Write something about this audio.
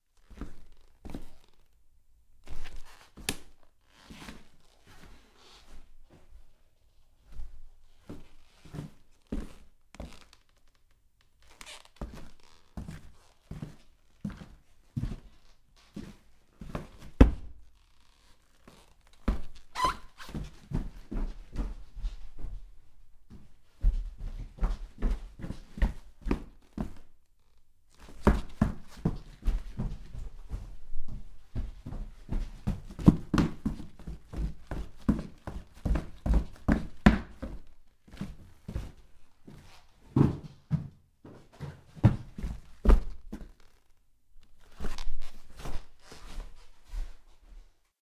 Walking/Running/Jumping in a pair of big SQUEAKY military combat boots.
leather, combat, walk, hallway, boots, squeaky, jump, run